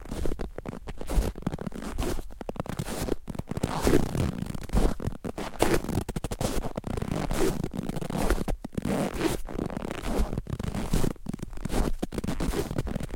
fotsteg på hård snö 8
Footsteps in hard snow. Recorded with Zoom H4.